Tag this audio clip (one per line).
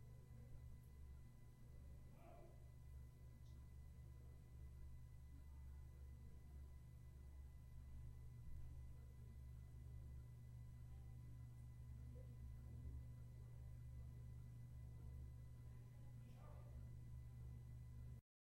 bathroom
talking